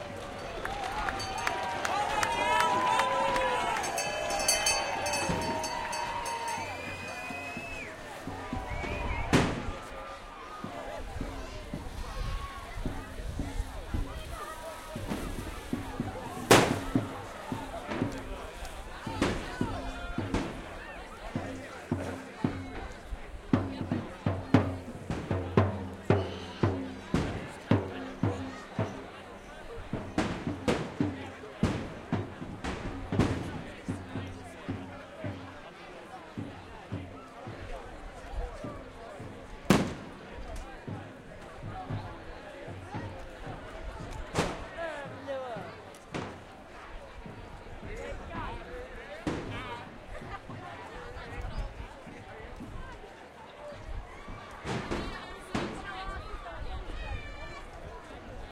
lewes cheers & bell & drum
Lewes bonfire night parade, England. Crowds of people dress in historic costumes and burn effigies of the pope and political leaders. Lots of bangs, fireworks going off, chanting, shouting.
march, fireworks, bonfire, crowd, lewes, noisy, people, bangs